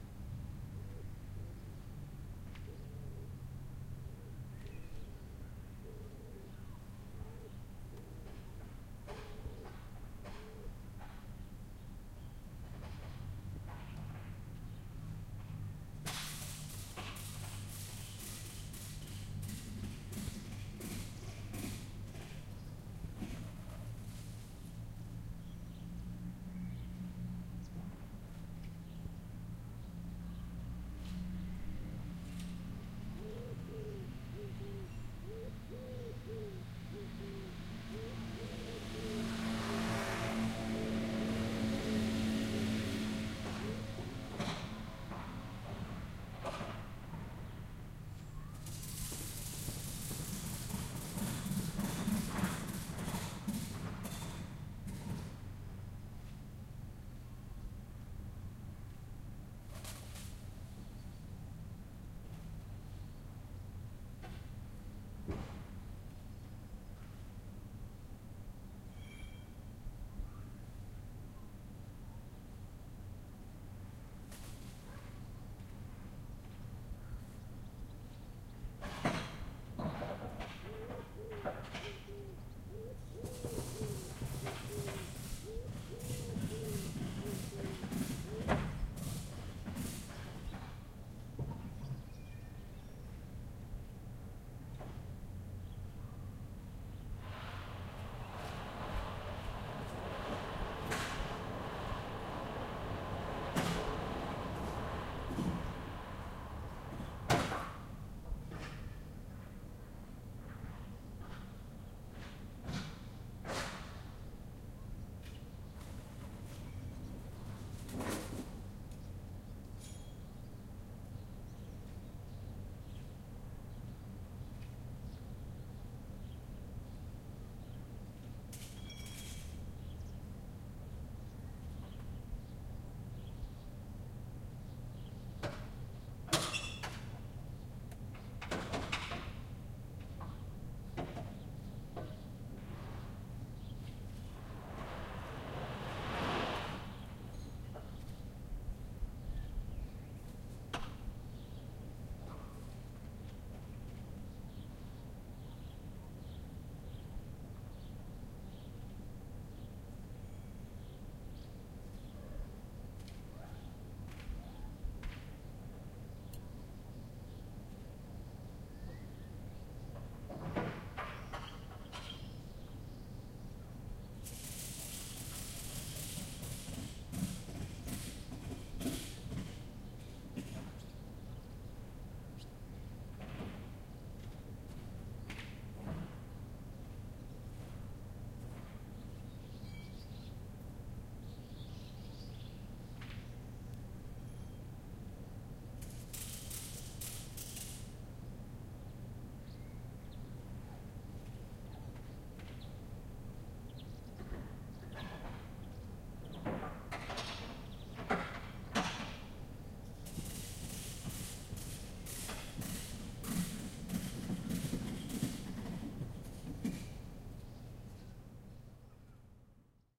110801-behind of fruit-processing plant
01.08.11: the second day of my research on truck drivers culture. Denmark, Oure, behind of the fruit-processing plant. Securing cargo (doing up belts). In the background sound of birds (pigeons), from time to time passing by cars and motorbikes. Rather calm ambience,